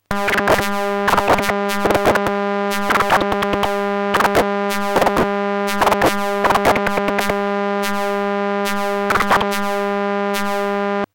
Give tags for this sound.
Audacity
demodulated
experimental
image-to-sound
light-probe
light-to-sound
modulated-light
Nyquist-prompt
processed
tv-remote

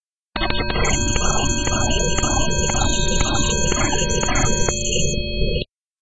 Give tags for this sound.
electronic
rhytmic
abstract
effect
image
audiopaint
weird
image-to-sound

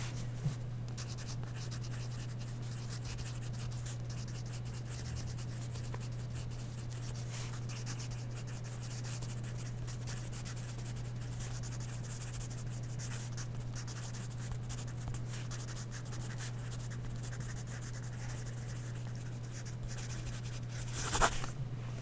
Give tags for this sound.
handwriting
paper
pencil
scratchy
writing

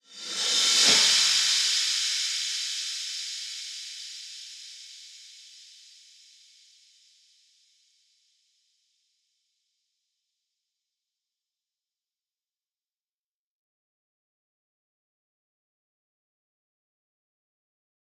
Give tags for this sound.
cymbal
echo
fx
metal
reverse

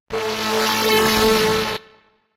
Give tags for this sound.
audio
gameaudio
effects
indiegame
game
soundeffects

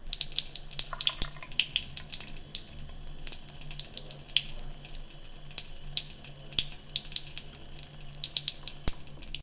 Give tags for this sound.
sink
water
azienka
zlew
toilet

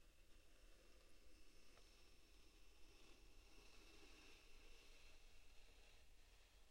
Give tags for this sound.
wood
interaction
scratch

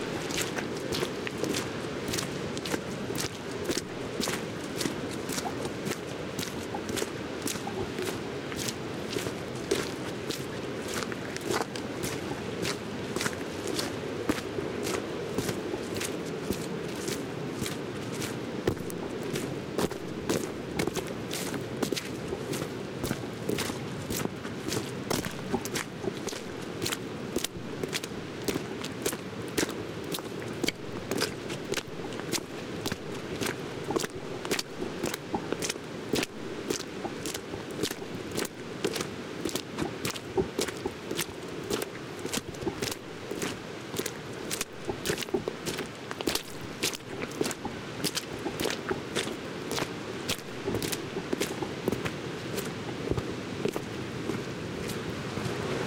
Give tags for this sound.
field-recording
Nature
oregon
stream
waterfall

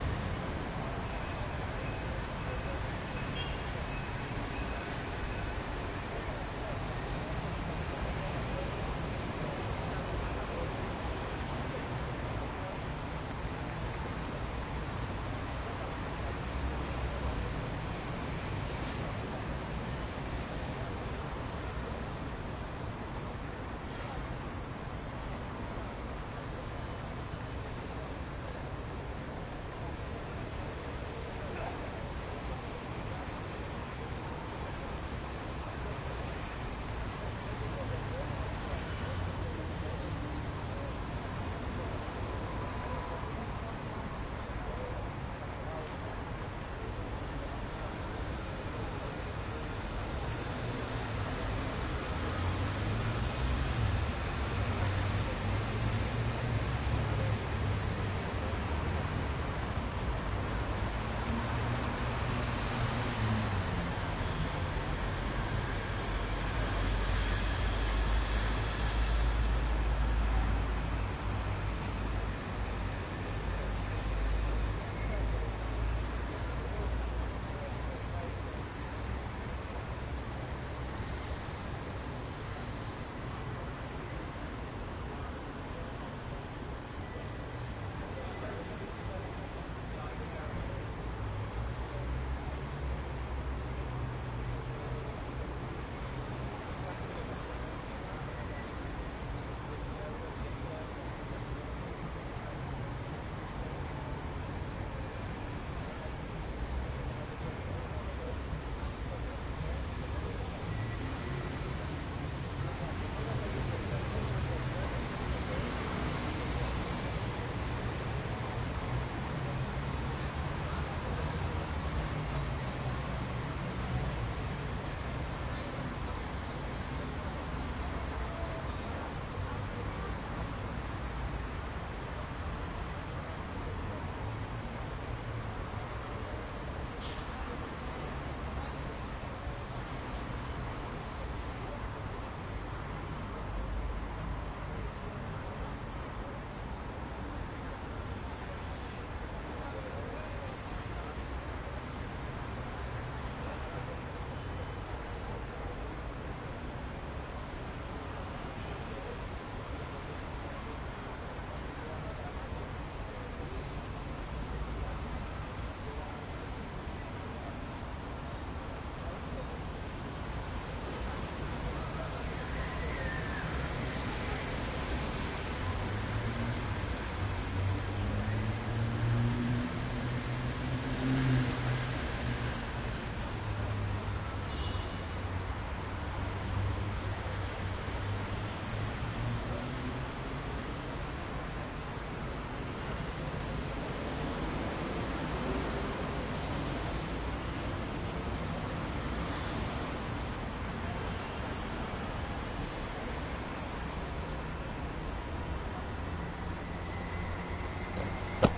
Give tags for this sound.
republics
place
paris

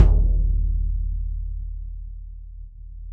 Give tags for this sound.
drum
velocity

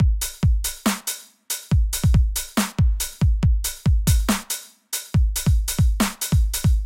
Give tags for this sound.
hi
open
dubstep
loop
minimal
kick
140

